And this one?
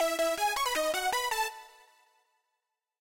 This is a EDM style hook phase with a 160 BPM written in E Major.